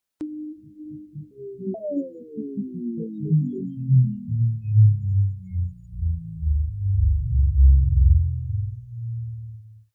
Deep Sea--Deeper Space
alien, electric, guitar, outer, processed, sci-fi, sea, ship, space, stretch, time, under